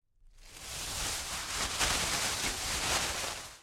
A hand rustling a thin plastic bag.